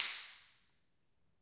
Snaps and claps recorded with a handheld recorder at the top of the stairs in a lively sounding house.
echo, stereo